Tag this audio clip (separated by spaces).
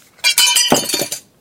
break breaking crack crash glass glasses pottery shards shatter smash splintering